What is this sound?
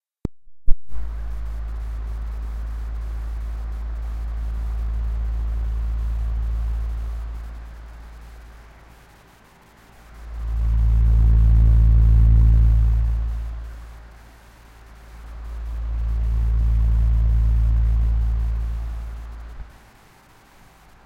Compressed sound of a fan makes for a great plane sound.